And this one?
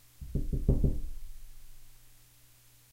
4 light knocks on computer room door
More like 5 fast, quiet knocks on a rather heavy door that leads to my computer room. This one sort of engages the frame properly.